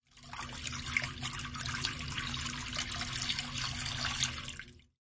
Watter running over a washer
RandomSession, Foley